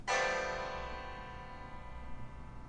58-Acordes disonantes(final)
Field-Recording Water Animals
Animals, Water